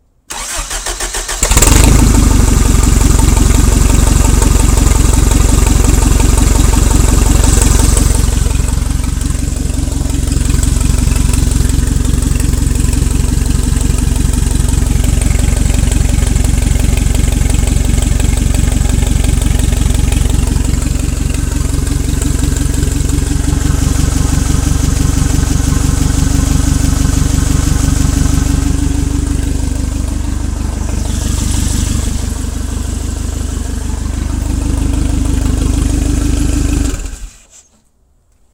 forklift running for a short time then shutting it off